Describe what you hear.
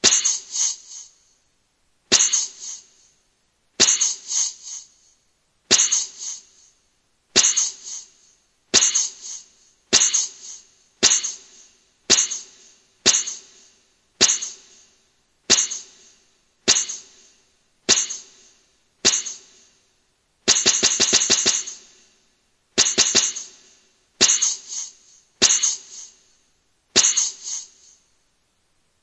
Fictive sound of a Spider-bite similar to the spider sound in Minecraft.
Created with a sample from my voice and some magic with the Iris 2 VST (no other samples were used).
bite, fiction, gamesound, spider